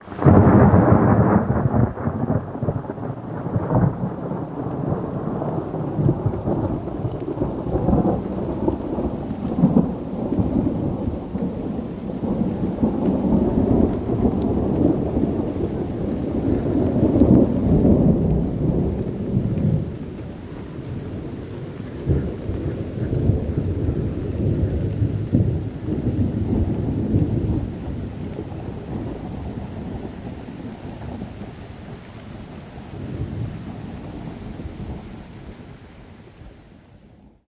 One of the close strikes from a storm on the 29th of July 2013 in Northern Ireland. Recorded with a Rode Stereo Videomic pro.
Thunder, Thunderstorm, Storm, Weather, Lightning